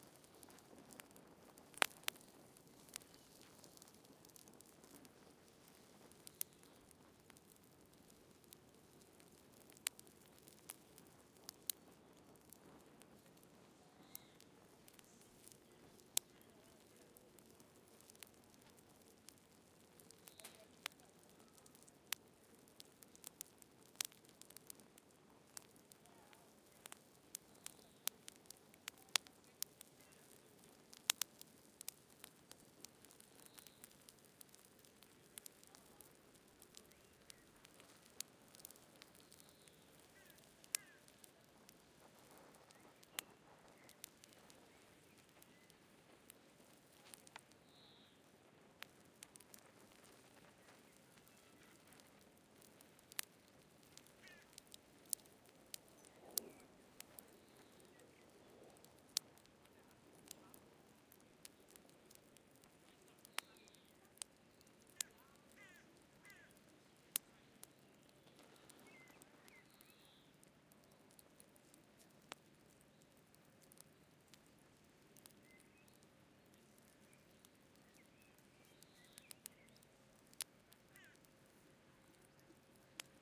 Fireplace at the beach, Elbe, Hamburg
Feuer am Strand an der Elbe, Hamburg